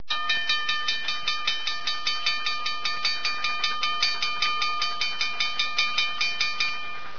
bell cancels train